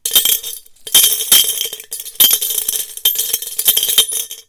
Coins Clinking
Coins and bottle caps being dropped into a glass jar